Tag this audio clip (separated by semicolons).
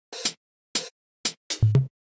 step; hihat; drums; hat; hats; Dubstep; cymbals; open; hihats; hi-hat; Loop; closed; hi-hats